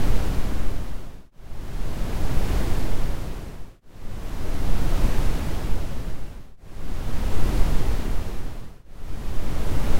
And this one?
Brown noise generated with Cool Edit 96. Envelope effect applied.